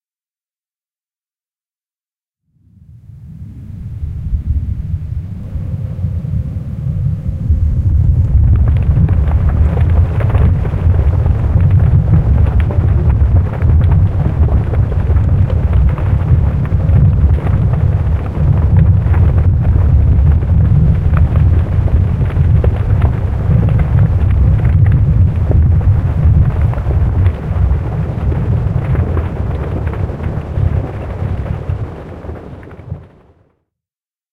Tried to create an earthquake-sound with sounds I have recorded with my Zoom H5
Erdbeben, low-frequency, rumble, earthquake, earth, terremto, vibration, low